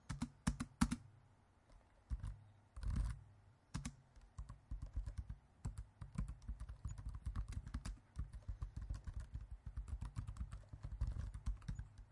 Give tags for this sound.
Recording
Stereo
Typing
Keyboard
Laptop
Macbook
Computer
Zoom
H1

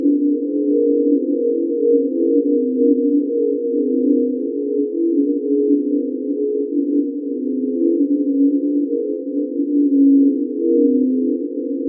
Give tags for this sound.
divine
evolving
soundscape
ambient
drone
space

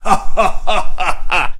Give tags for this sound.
arcade
fantasy
game
gamedev
gamedeveloping
games
gaming
Human
indiedev
indiegamedev
male
RPG
sfx
Speak
Talk
videogame
videogames
vocal
voice
Voices

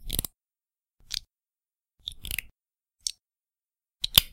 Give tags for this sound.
brick
build
connect
lego

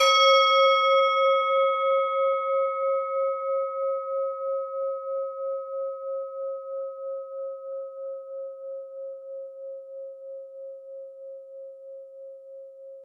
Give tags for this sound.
dong
bell
mono

